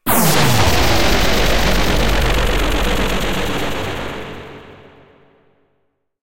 MA SFX MiscPack 1 4
Sound from pack: "Mobile Arcade"
100% FREE!
200 HQ SFX, and loops.
Best used for match3, platformer, runners.
electronic, digital, loop, freaky, effect, machine, fx, future, soundeffect, sound-design, game-sfx, lo-fi, glitch, electric, abstract